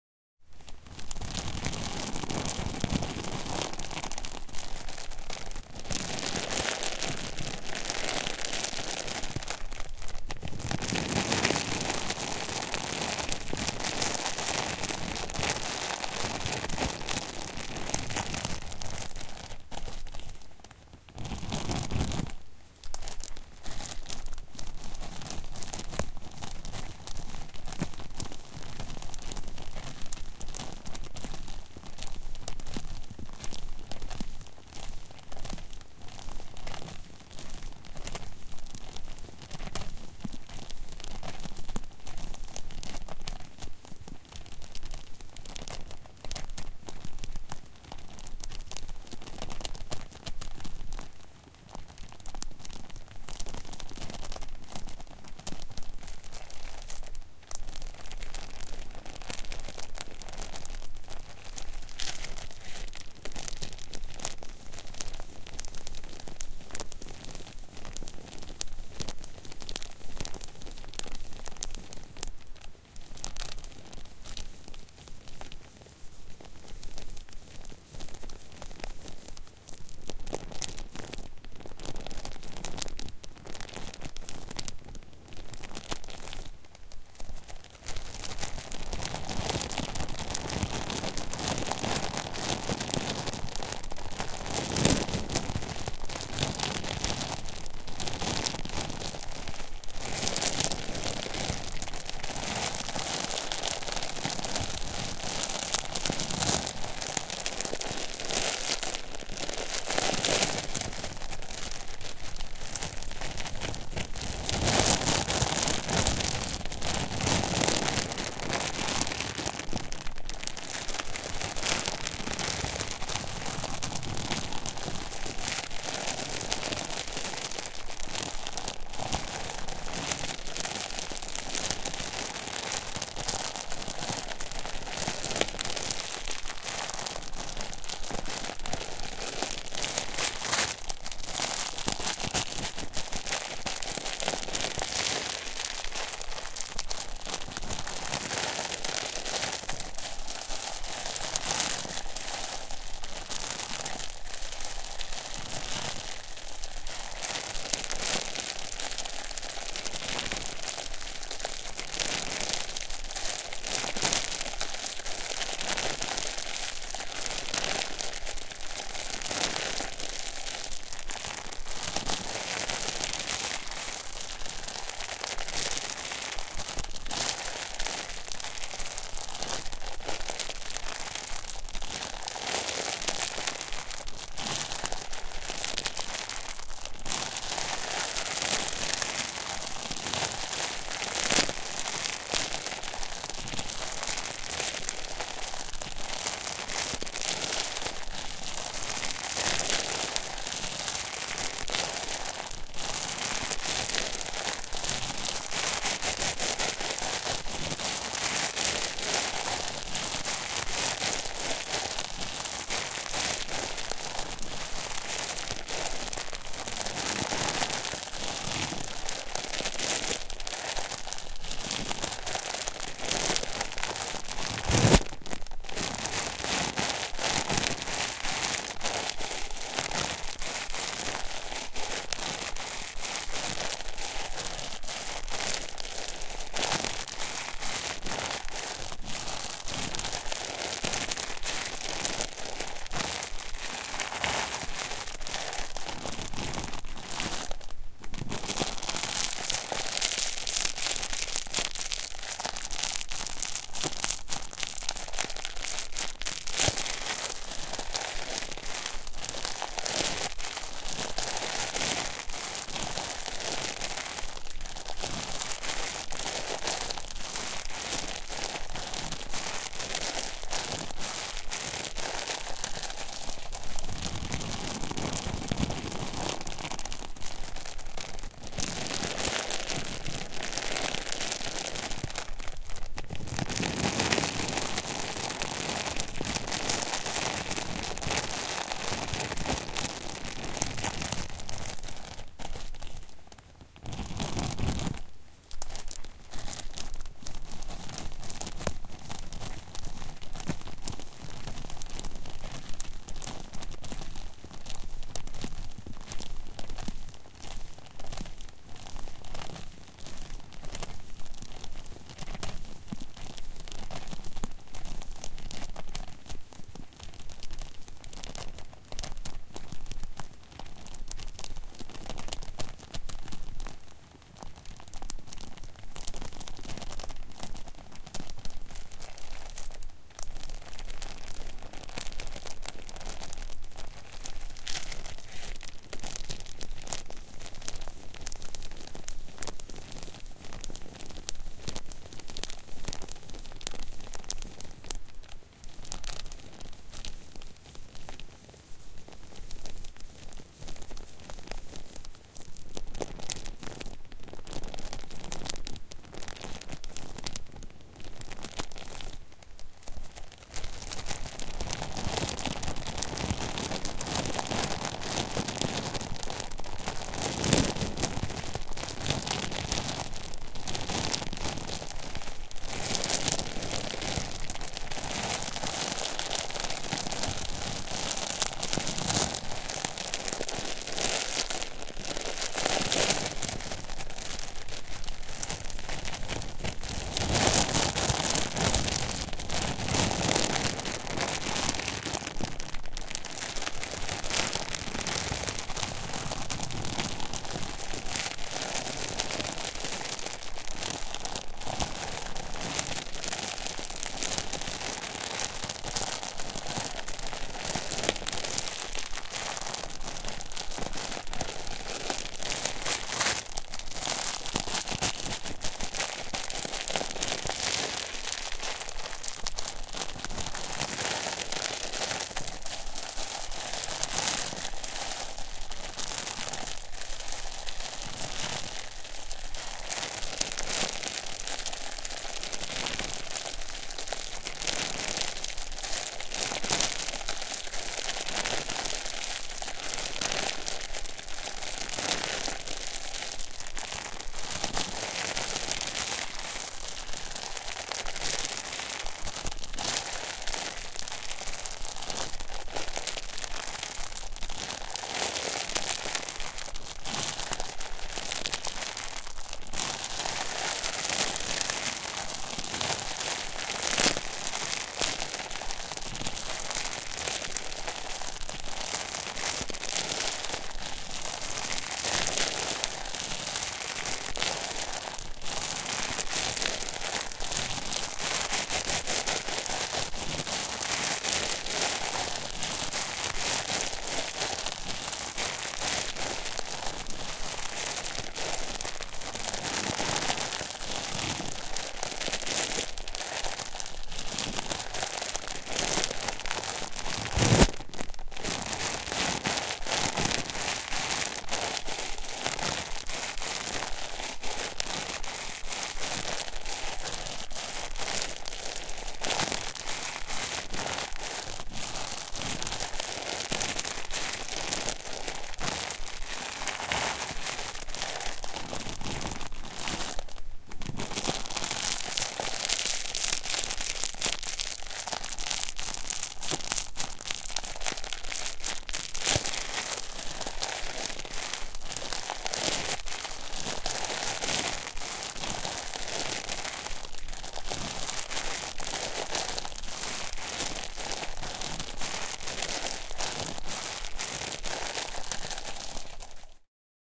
Plastic bag 2. Recorded with Behringer C4 and Focusrite Scarlett 2i2.